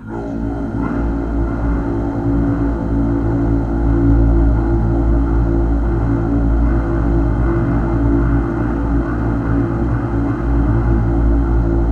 Male Vocal Drone
Created by processing a sample of an older man with reverbs, delays, and granular synthesis to sustain the sound and fill out the stereo field.
processed, deep, vocal, texture, human, loop, voice, drone, male, phrase, speech, granular, ambient